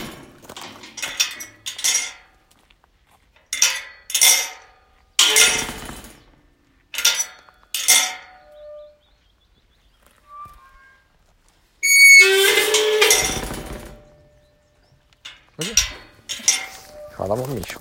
This is a field recording done with iphone XS on Isle of Skye - metal doors in the fence on the way to the sea so that humans can pass and sheep cannot.
door, closing, metal, field-recording, open